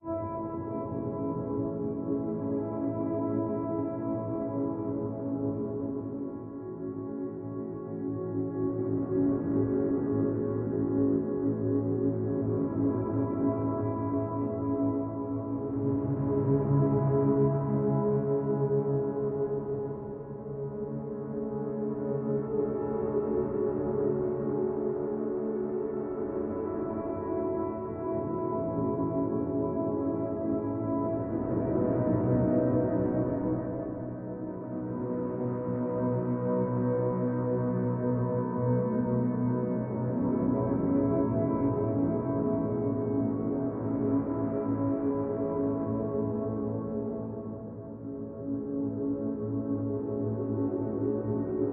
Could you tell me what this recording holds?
This sound or collaborations of other sounds was made using FL Studio 11 along with "Paul's Extreme Sound Stretch". Various VST's effects were applied to these sounds before stretching. This sound may or may not have been altered via stretching, panning, Equalization, Parametric EQ, Reverb, Delay, Distortion, Filtering/Lows/Highs/Mid's, Layering, chopping and many other sound manipulation techniques.
Extended version..
Elementary Wave 11v2